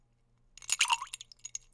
sh ice cube drop into glass 2
Dropping a couple of ice cubes into a cocktail glass with liquid in it. Schoeps CMC641 microphone, Sound Devices 442 mixer, Edirol R4-Pro recorder.
drop, glass, ice-cubes